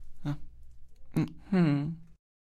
48 Voz abrazo
voz a punto de llorar
human, sad, voice